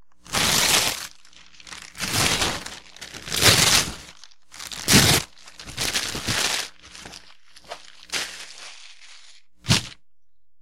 paper02-tearing paper#1
Large sheets of packing paper being torn and shredded. Somewhat like what kids do with wrapping paper on Christmas/birthday presents.
All samples in this set were recorded on a hollow, injection-molded, plastic table, which periodically adds a hollow thump if anything is dropped. Noise reduction applied to remove systemic hum, which leaves some artifacts if amplified greatly. Some samples are normalized to -0.5 dB, while others are not.
christmas, newspaper, rip, ripping, shred, tearing, wrapping